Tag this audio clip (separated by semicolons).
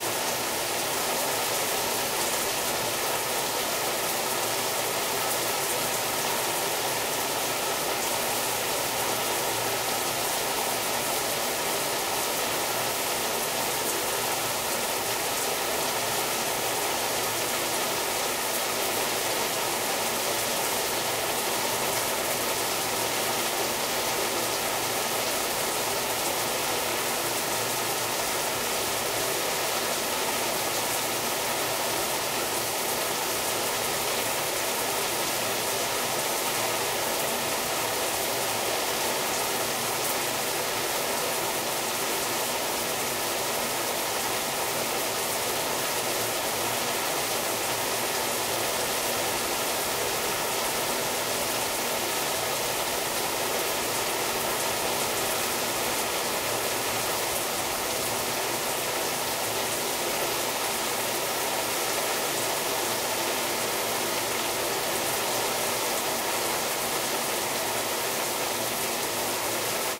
close; slate-digital-ml2; bathroom; rain; field-recording; water; Zoom-f8; curtain; shower; drippling